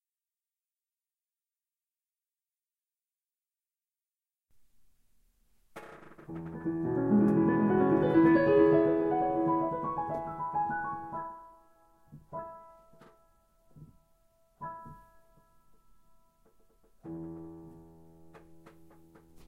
Debussy Arabesque no 1 clip 5
A short clip of a student practicing a section of Debussy's Arabesque no 1 on a Roland Digital Piano. You also hear the sound of a creaking piano bench.
classical, piano, practicing